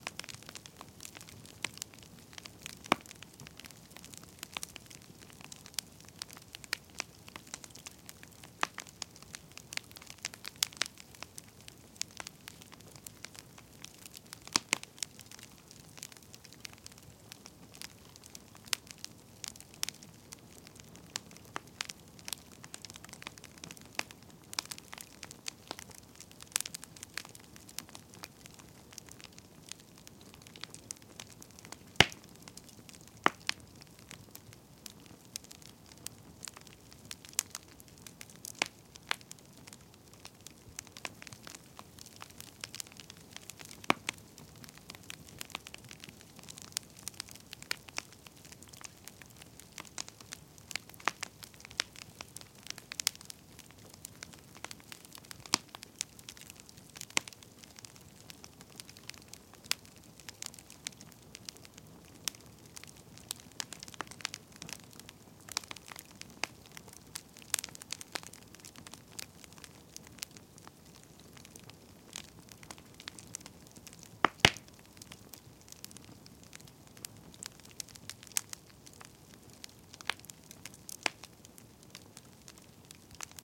Bonfire HQ
Sound of Bonfire
oven, fireplace, crackling, firewood, Fire, coal, flame, flames, burning, ash, spark, soot, stove, smoke, grill